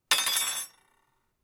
Spoon clattering (4)
A metal spoon being dropped onto my desk. Recorded from about 30cm away. It clatters and vibrates for a bit.